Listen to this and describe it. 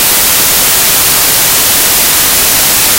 Gala GARCIA 04
Description de base:
sound 4. 3 seconds.
Typologie:
Continu Complexe
Masse: son seul , nodal
Timbre Harmonique: parasitaire
continu-complexe, Audacity, X